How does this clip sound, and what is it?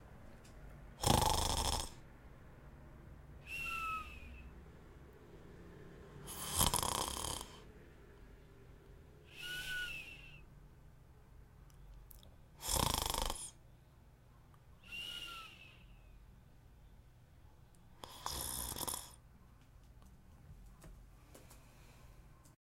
15 -Ronquido leve
persona, roncando